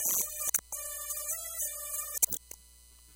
vtech circuit bend057
Produce by overdriving, short circuiting, bending and just messing up a v-tech speak and spell typed unit. Very fun easy to mangle with some really interesting results.
speak-and-spell, circuit-bending, micro, music, digital, noise, broken-toy